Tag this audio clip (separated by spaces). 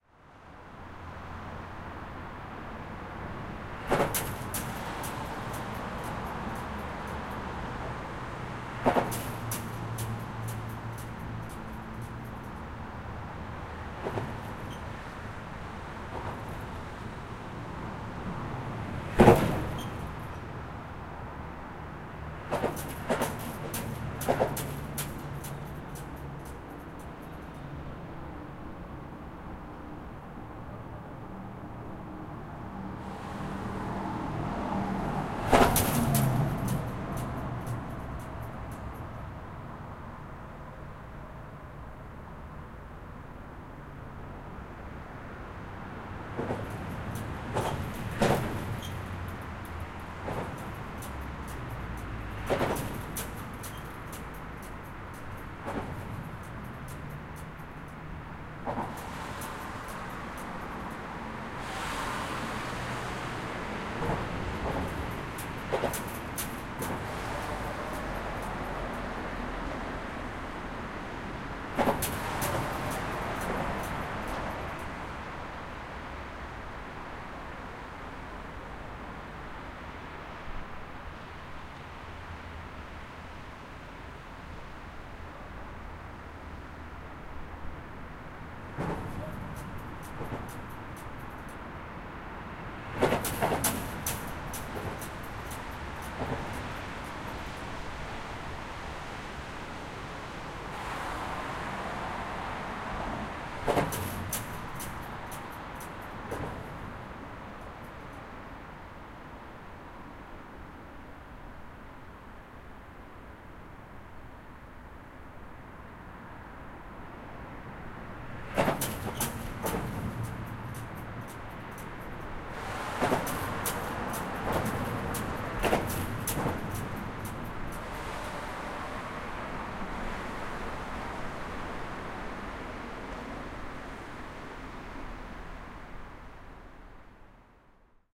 field-recording seoul traffic korea car